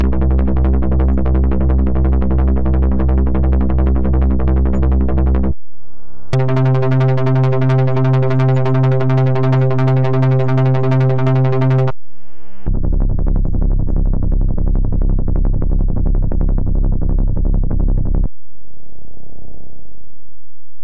Some choppy bass sounds from Lazerbass in Reaktor
bass, lazerbass, synth